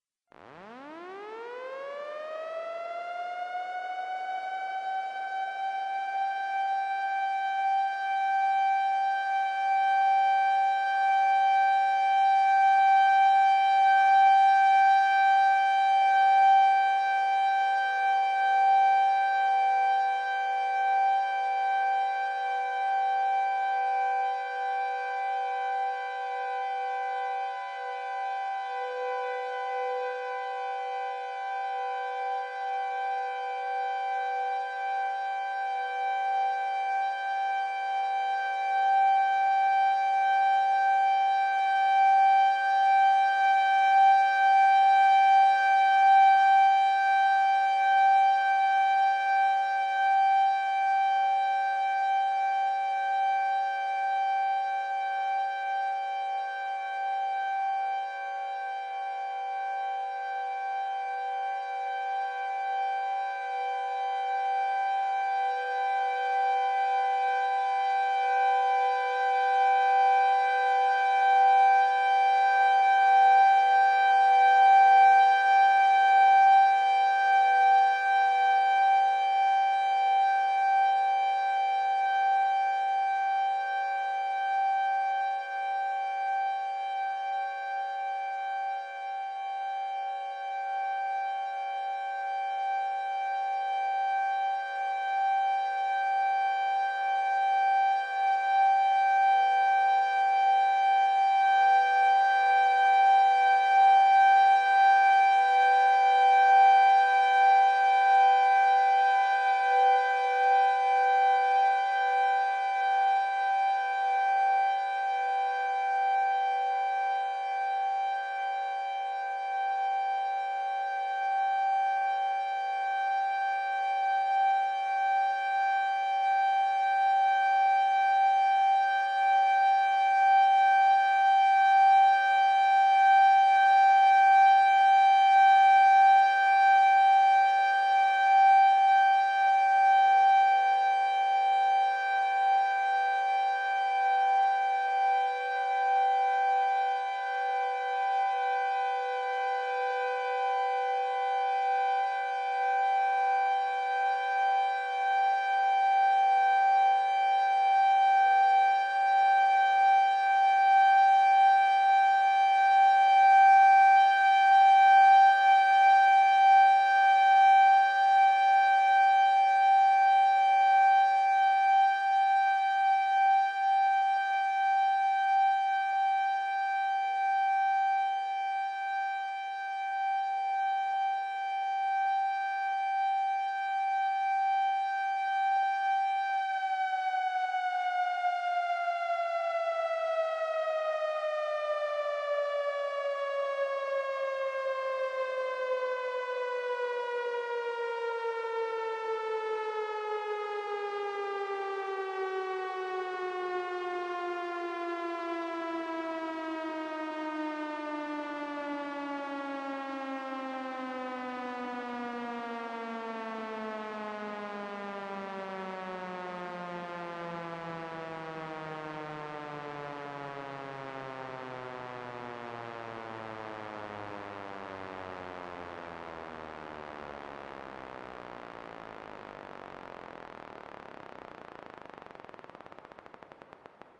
Dover, OH Siren Ambience Synth
This is a Synth of Dover, OH. Dover has recently put up several new FS 508's, they have already has 3rd Gen 2001's, a T-121, and a Model 5/7. This is probably my only Synth that is perfect.
Model, 2001, 57, 508, T-121